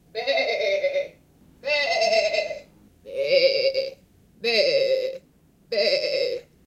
1 balando macho
goat, lamb, sheep